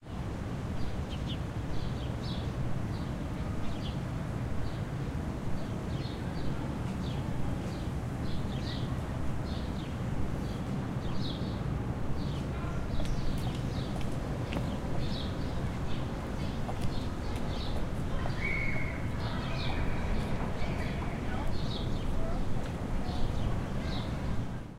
Air tone at a university campus quad with birds
Recorded outside university.
peace, atmospheric, background, tone, colleage, background-sound, white-noise, soundscape, calma, calm, atmos, general-noise, ciudad, air, atmo, suburbio, ambience, ambiance, airtone, paz, ambient, atmosphere, city, room-noise, university, ruido, tono